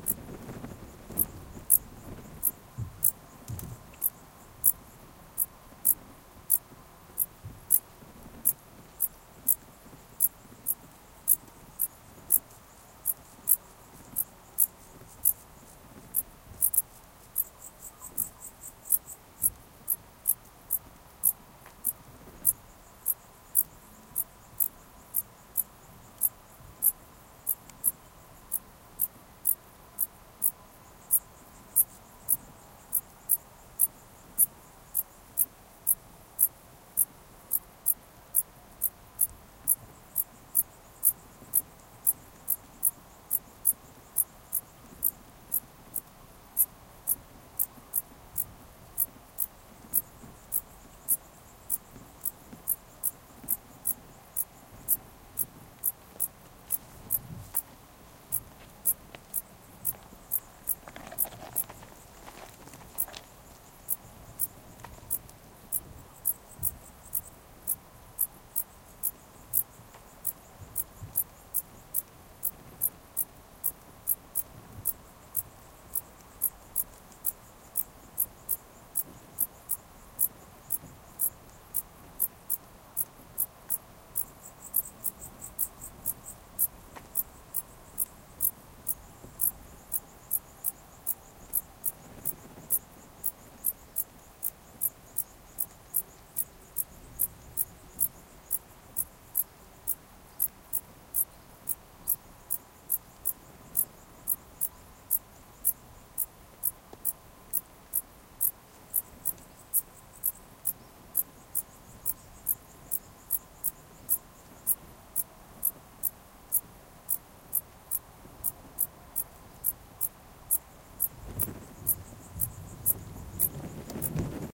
as opposed to japan, crickets in the austrian alps can become quite lonely
nature, austria, ambient, field-recording